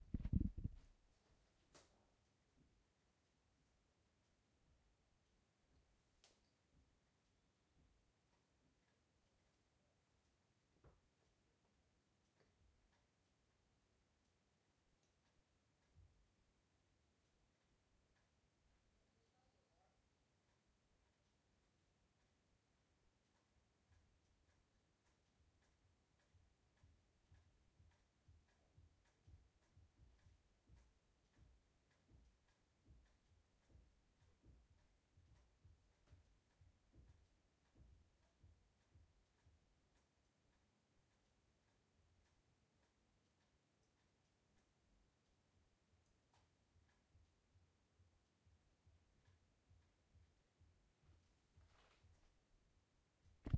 Kitchen atmos with clicky sunflower
Another quiet background atmos. It's a kitchen with a plastic toy sunflower that nods its head in a clicky way.
From the makers of Release The Clowns Sketch Comedy Podcast which you can find here
kitchen, interior, ambient